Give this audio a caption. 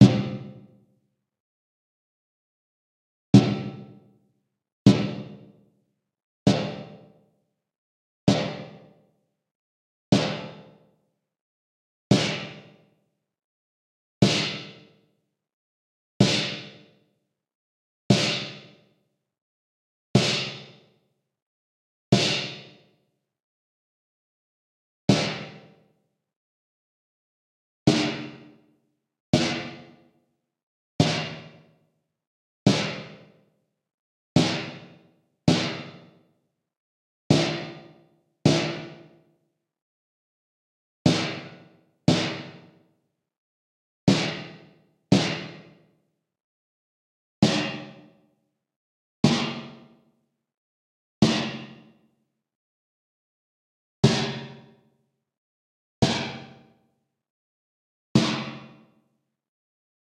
Snare hits 1
Several synthesized snare hits.
natural, snare